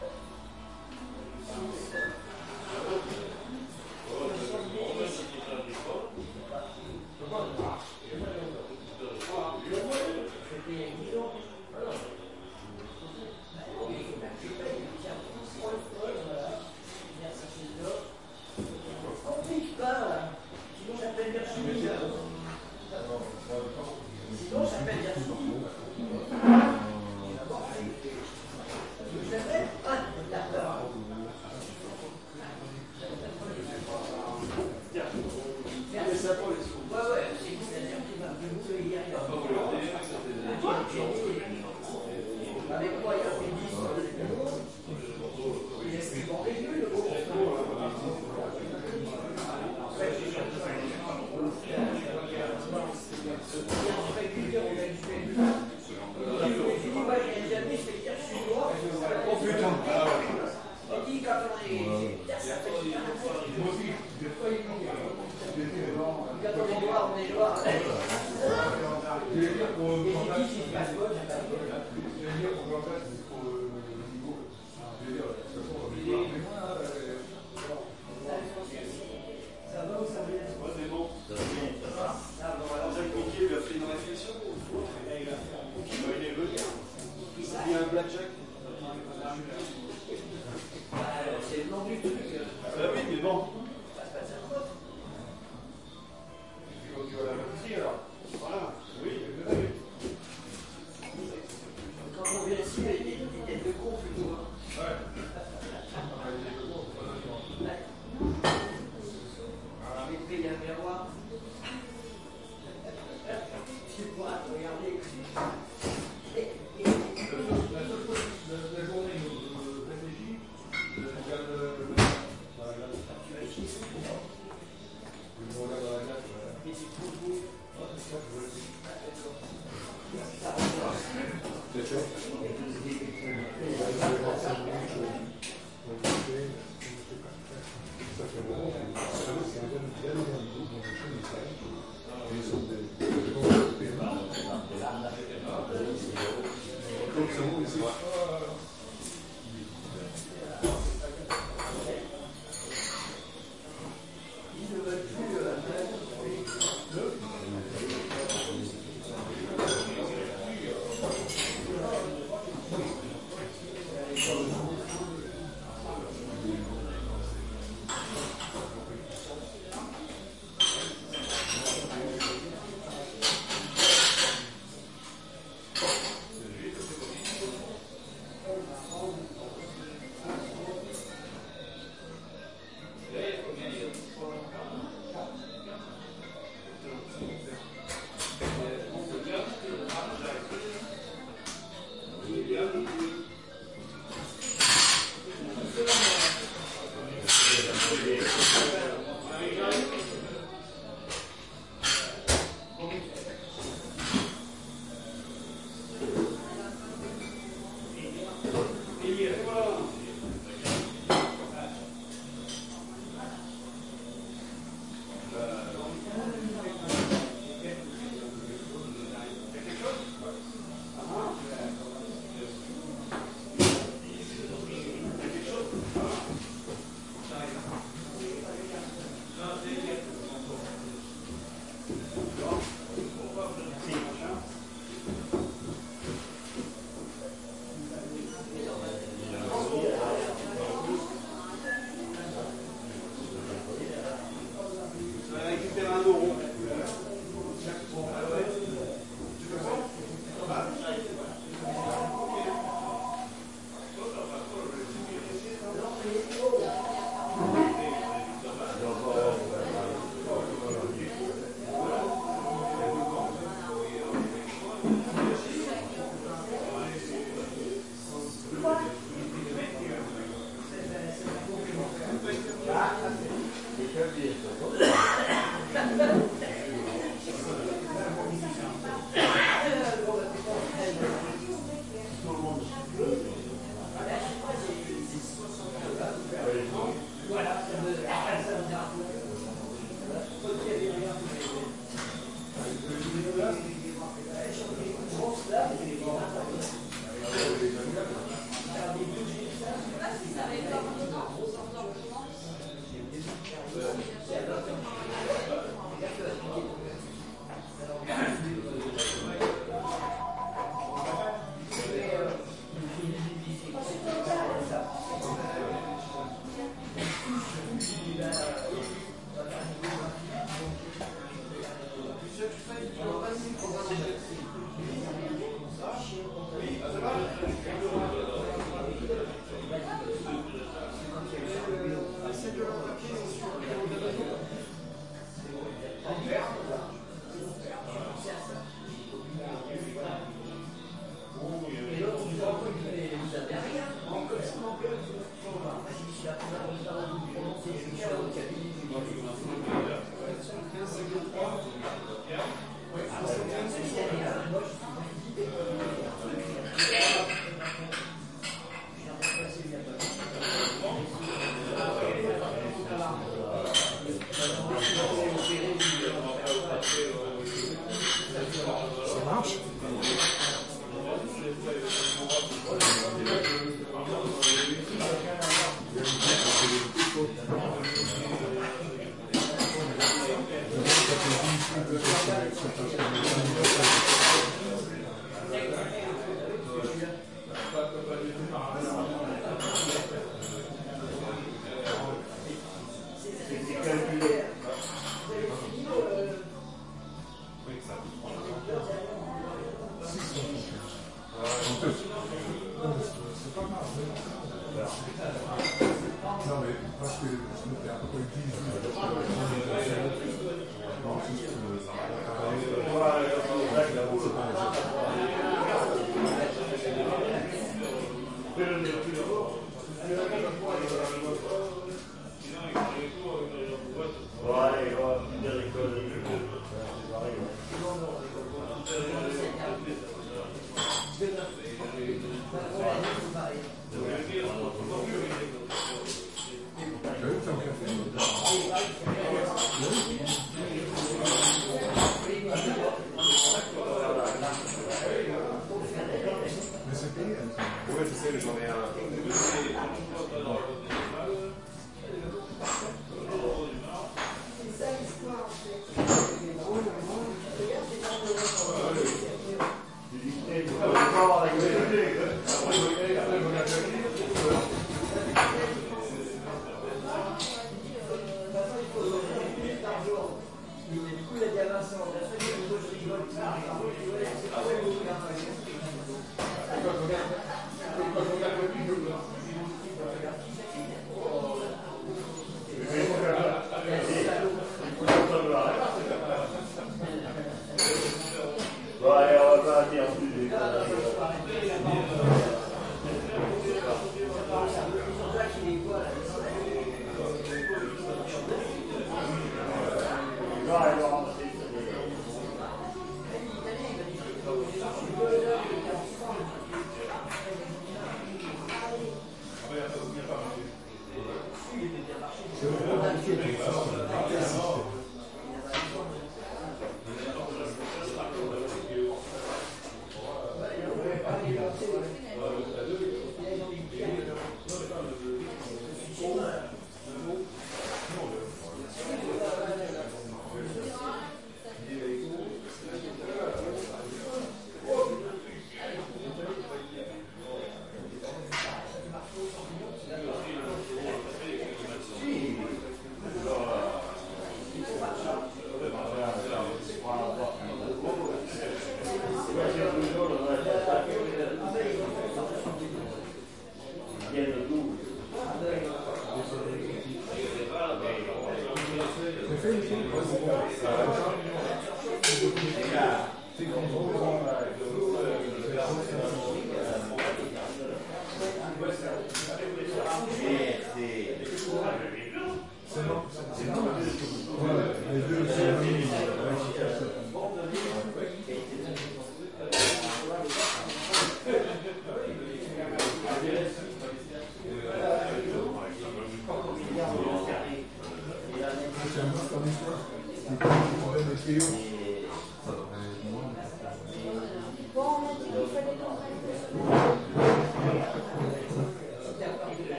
French Cafe in the Morning (take 2)
This is the atmosphere in the morning in a quite loud café place in the morning.
Friendly and not so fancy atmosphere, people come there to drink coffee or alcohol, and gamble on horses.
The music is sometimes playing in the background.
Specifications :
Tascam DR-40 on A/B position.
A transparent equalizer was used to filter out some agressive frequencies.
A small limiter was applied to get rid of excessively loud peaks.
Two recordings of 10 minutes each were done this morning. The settings are the same except for the position of the microphone in the room.
field-recording, restaurant, province, atmosphere, cafe, ambience, bar, people, caf, ambiance, PMU, village, france, french